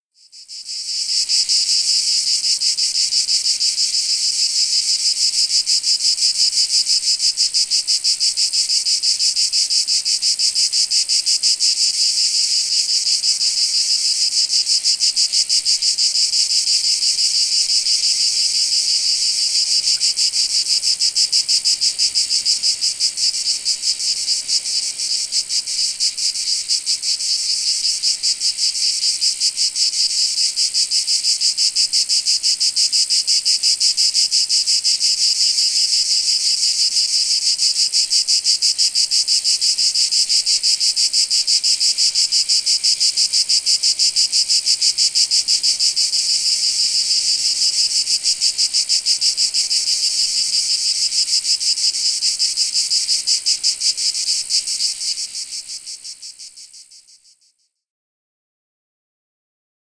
Cicadas on tree, recorded in Corsica
insects, animals